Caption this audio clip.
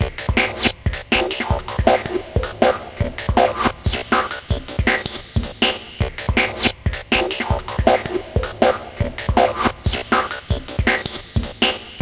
gify - dnb loop jam14
160 BPM techno/dnb drum loop